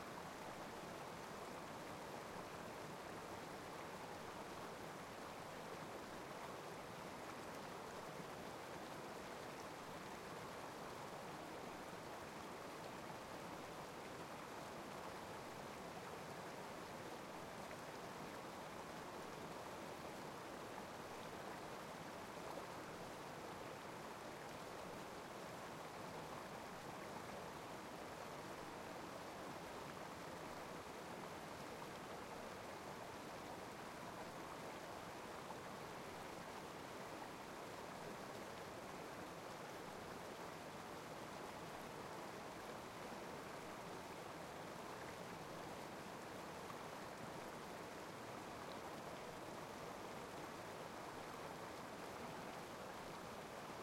Waterfall stream from distance
Waterfall in Entlebuch, Swiss Alps. Recorded with a zoom h1
stream, flow, field-recording, mountains, waterfall, switzerland, water, entlebuch, woods, splash, cascade, luzern, alps, alpine, nature, europe, forest